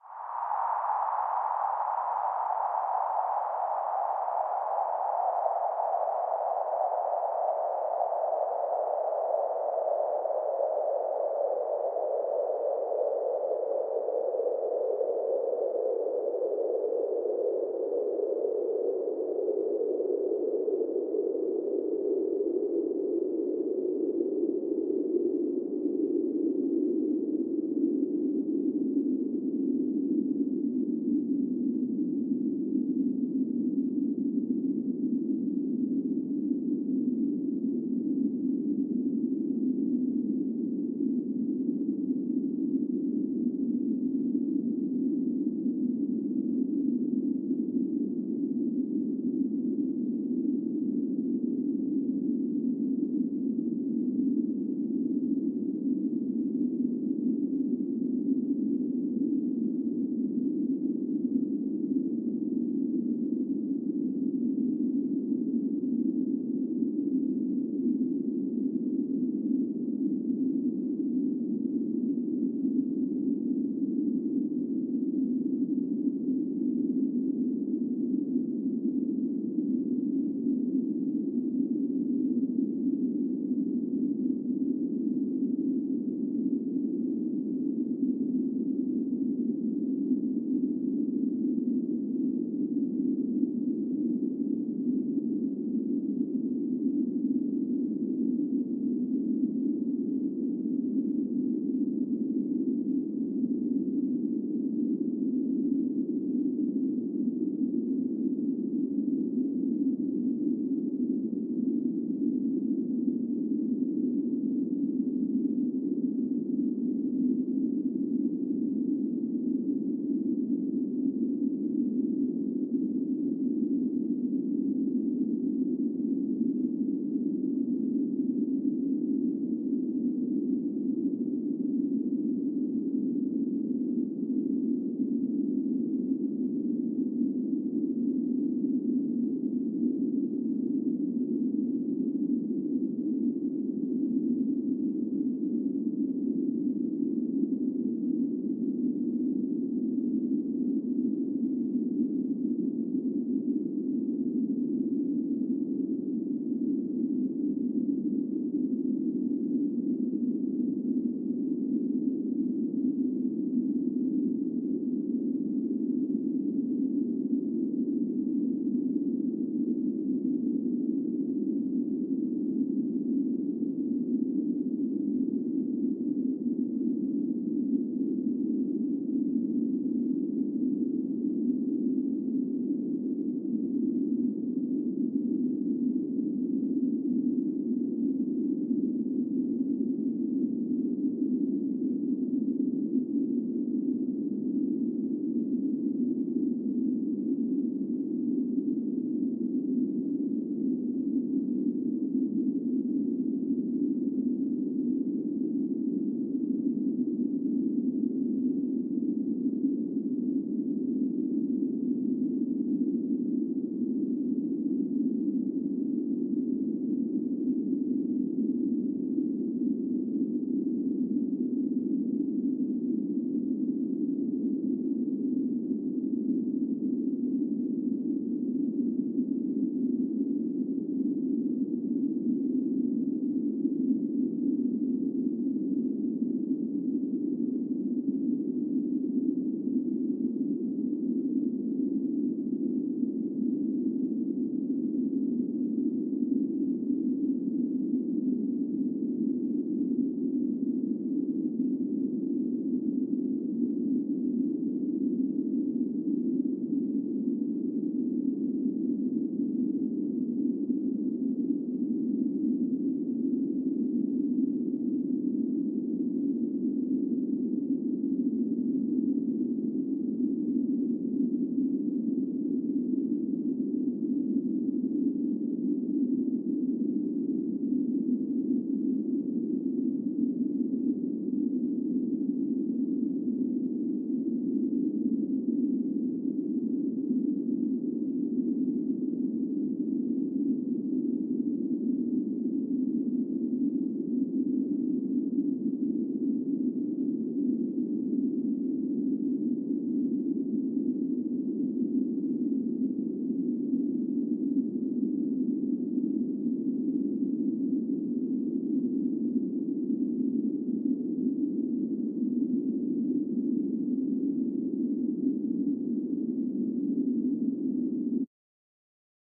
Dark-Wind
This is a little bit of wind that I created some time ago, its a synthesized wind created in zyn-add-sub-fx one of my favorite go to synths to mess around with. I keep it on file for shots in films where we have vast empty fields that just scream desolation and emptiness for miles.